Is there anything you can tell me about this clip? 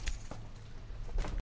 Keys Jingle
A muffled key jingle.
jingle, keys